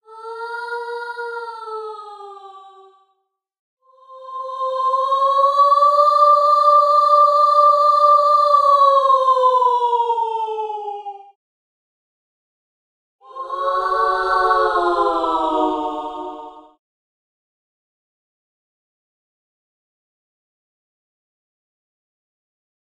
ghost tease
Ghostly female wail. Might be ghosts, or might be deadly sirens calling across misty waters to lure hapless sailors to their doom.
Voice synthesis achieved by mixing formant filtered oscillators. Pitch bending is added to the note track to produce a descending, spooky wail.
paranormal, spooky, female, ghost, spectre